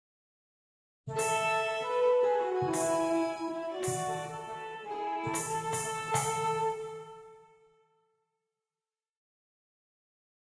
Medieval Ding Dong3
A sort of Renaissancey version of the first two lines of Ding Dong Merrily on High, composed on Cubase with samples from the Edirol Orchestral plug in (a bit of harp, french horn, alto flute and violin with kettle drum and sleigh bell percussion). This was for the intro to a radio sketch but hopefully could be useful for other theme setting. Sorry in advance for not doing the whole song.
Early, Renaissance, Christmas, Carol